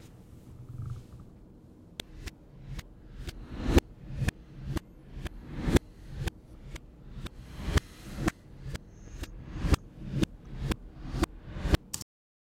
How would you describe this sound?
Table Slap Reverse
I slapped a desk and reversed the sound.
MTC500-M002-s13, slap, table